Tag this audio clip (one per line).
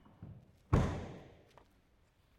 4x4
car
close
closing
door
reverb
shed
slam
truck